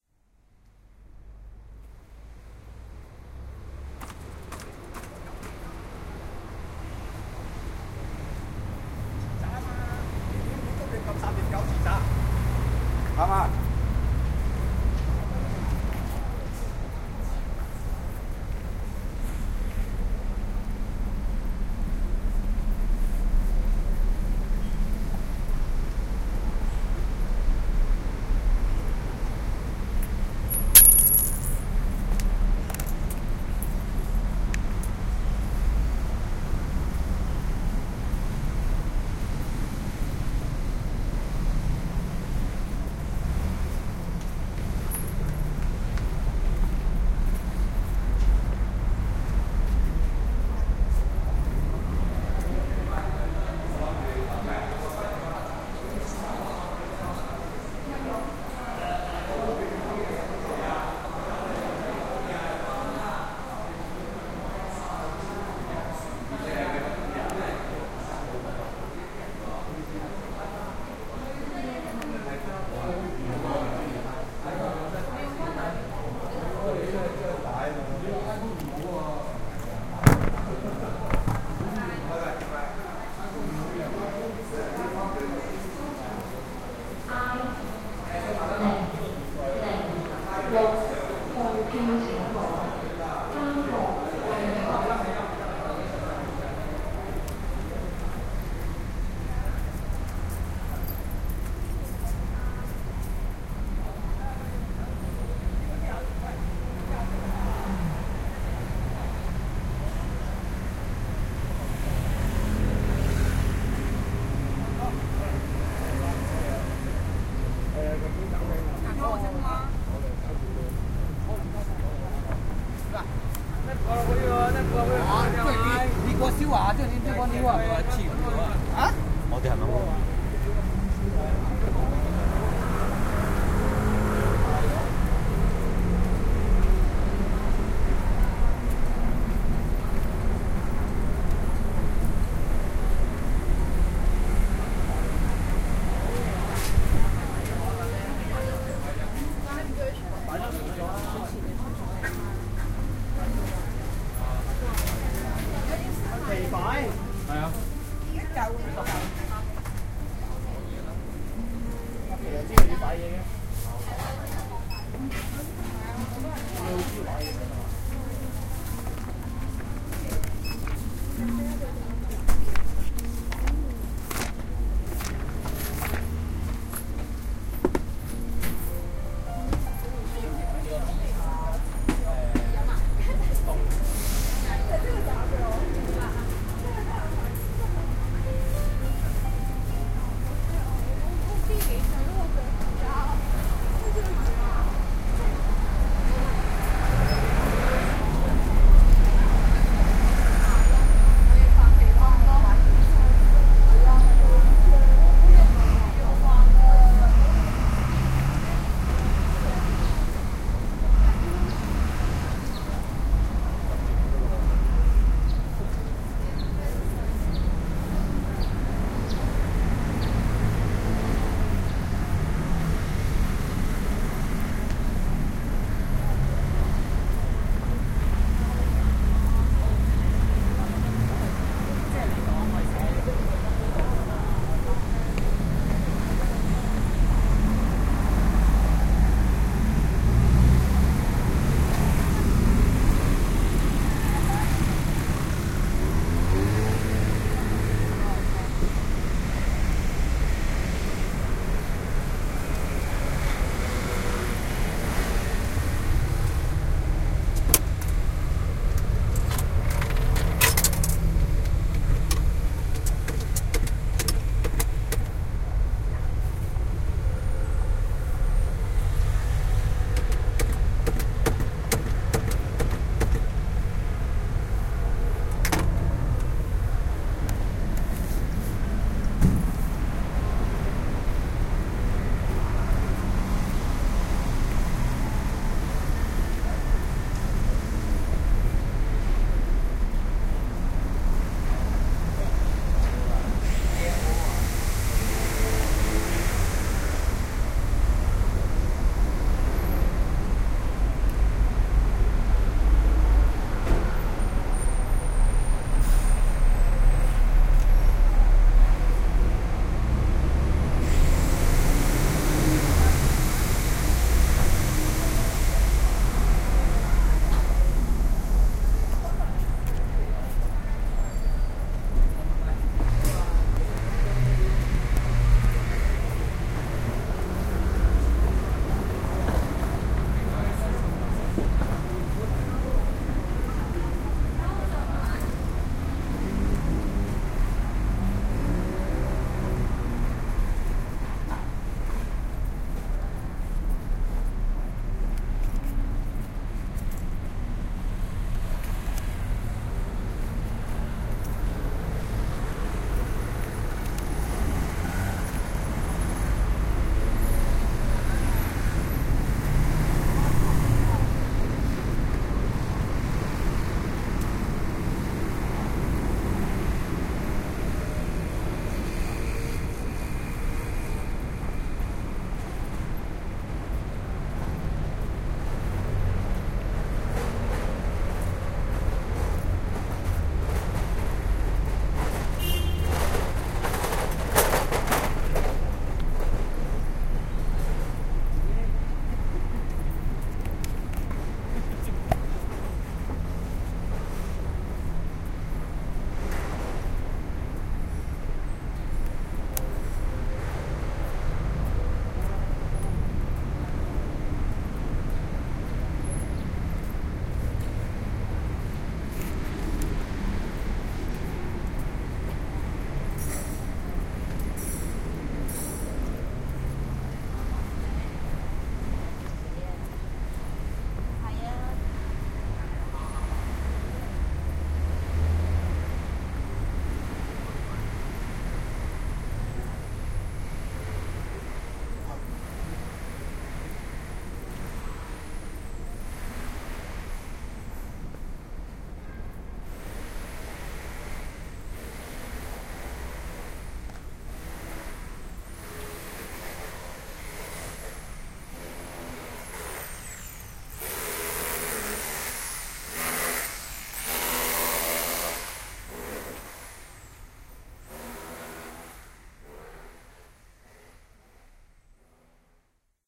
Group A A-FieldRec
Field Recording for the Digital Audio Recording and Production Systems class at the University of Saint Joseph - Macao, China.
The Students conducting the recording session were: Ip, Henry Chi Chong; Lam Song Kit; Vong Wang Cheng; Lam Hao San; Lao, Thomas Chon Hang
field-recording, Garden, macao, soundscape